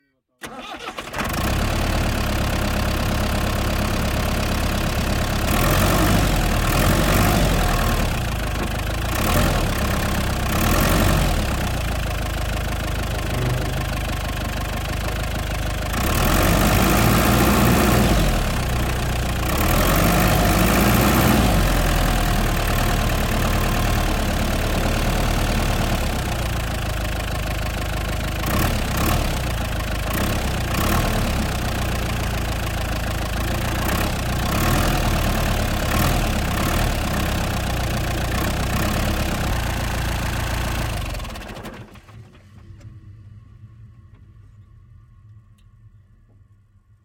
This sound effect was recorded with high quality sound equipment and comes from a sound library called Excavator MF 860 which is pack of 83 high quality audio files with a total length of 145 minutes. In this library you'll find various engine sounds recorded onboard and from exterior perspectives, along with foley and other sound effects like digging.